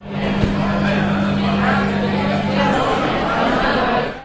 This is the sound of some people talking and a microwave in the dinning room of the UPF campus Poblenou at 14:00.
Recorded using Zoom H4, normalized and fade-in/fade-out added with Audacity.